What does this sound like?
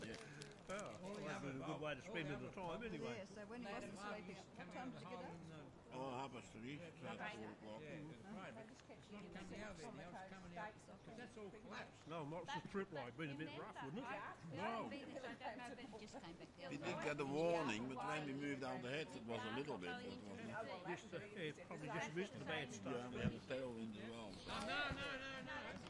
Small group talking at lunch
About a dozen hikers gathered for lunch: talking, bird sounds. Recorded near Shale Oil mine, Latrobe, Tasmania, on a Marantz PMD661 with a Rode NT4, 8 July 2018. Small amount of wind rustle in trees.
bush, camping, lunch, talking, Tasmania